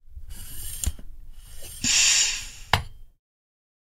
Bicycle Pump - Metal - Fast Release 04
A bicycle pump recorded with a Zoom H6 and a Beyerdynamic MC740.
Valve, Pressure, Metal, Pump, Gas